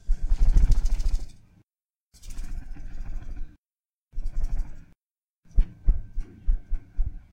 dh flutter collection
I took a plastic ruler and went crazy with it in front of my microphone.